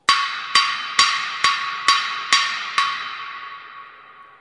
SonicSnap JPPT6 Handrail
Sounds recorded at Colégio João Paulo II school, Braga, Portugal.
handrail, Portugal, field-recording, Joao-Paulo-II